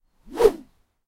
Bamboo Swing, B19
Raw audio of me swinging bamboo close to the recorder. I originally recorded these for use in a video game. The 'B' swings are slightly slower.
An example of how you might credit is by putting this in the description/credits:
The sound was recorded using a "H1 Zoom recorder" on 18th February 2017.
whoosh, swinging, swish, swing, woosh, whooshing